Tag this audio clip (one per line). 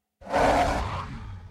animal
creature
monster
roar